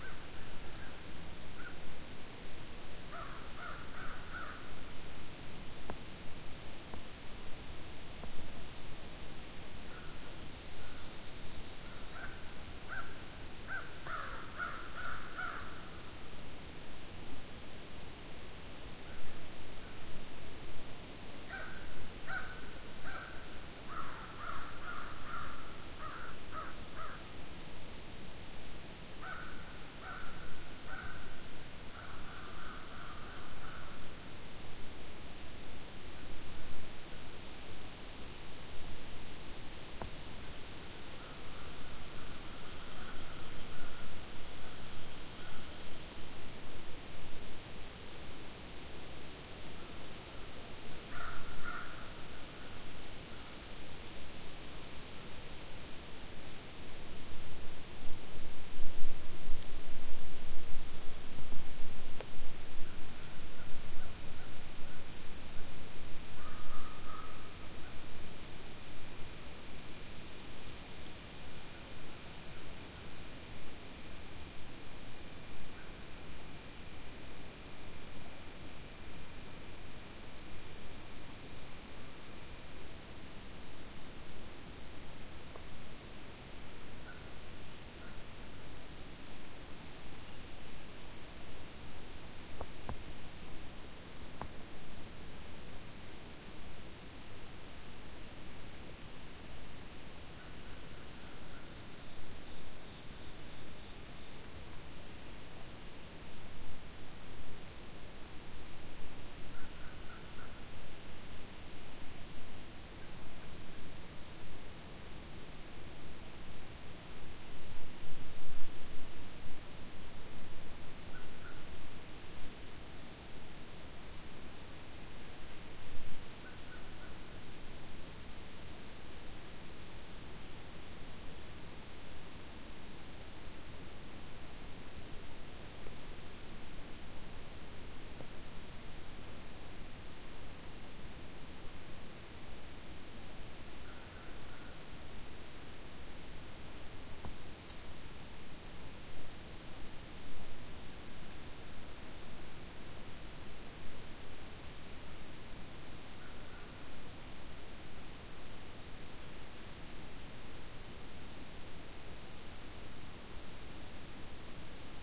Stereo field recording taken at 6:30PM deep in the woods of rural North Carolina on the eastern seaboard of the United States. Largely free of human sounds.